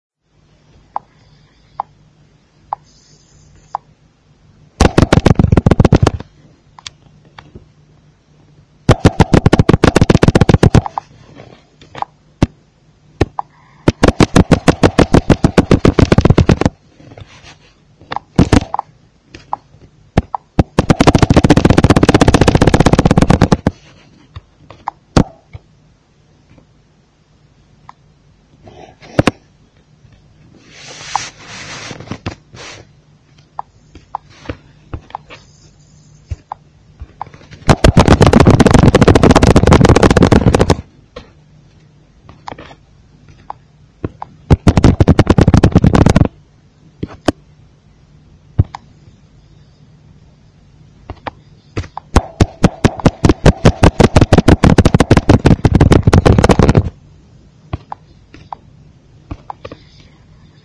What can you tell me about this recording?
1min rage
my raaaaa
aaaaaaaaaaaage